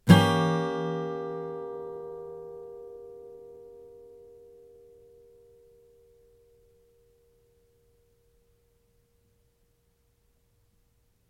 chord Edim
Yamaha acoustic through USB microphone to laptop. Chords strummed with a metal pick. File name indicates chord.
chord, guitar, strummed, acoustic